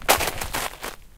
Footstep in the snow 01 [RAW]
Raw and dirty recording of my own footstep in the snow.
Captured using a Zoom H5 recorder and the included XY-capsule.
No post-processing has been applied.
Cut in ocenaudio.
Enjoy. ;-)
frozen, crunch, step, raw, footsteps, snow, footstep, recording